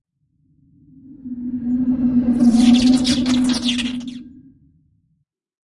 Part of a series of portal sound effects created for a radio theater fantasy series. This one is a straight-forward mechanism sound with some "sparks".
portal, mechanism, sci-fi, sparks, transporter, fantasy